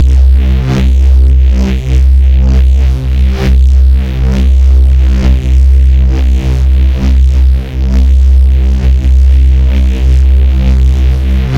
ABRSV RCS 025
Driven reece bass, recorded in C, cycled (with loop points)
bass, driven, drum-n-bass, harsh, heavy, reece